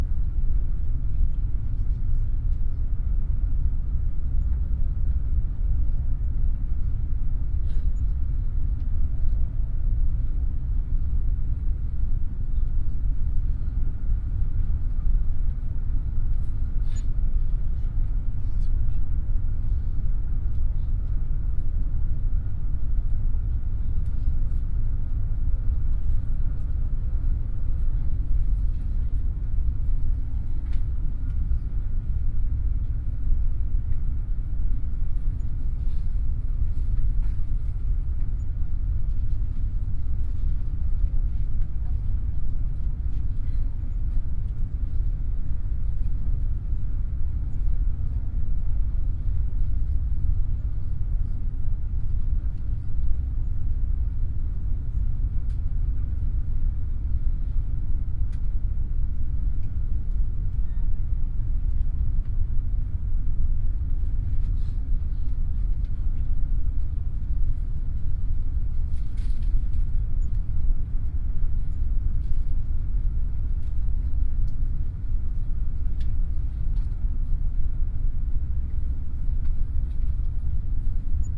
on a Fasttrain ICE
Another one: this is still what it sounds like, sitting in a second
class compartment on the fast ICE train. The track was recorded with a
Sharp MD-DR 470H minidisk player and the Soundman OKM II binaural microphones.